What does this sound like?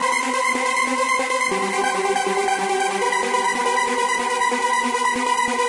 Live Trance Synth 02
arp, live, melody, sequenced, synths, trance